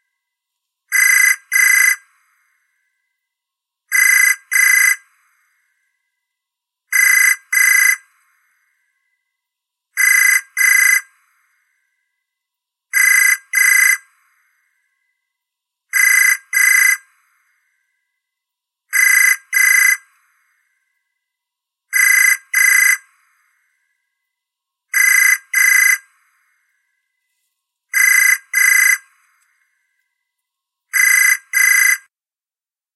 Telephone Ring UK 3b
A stereo recording of an UK telephone ringing (cut to loop). Rode NT 4 > FEL battery pre-amp > Zoom H2 line in
ring, uk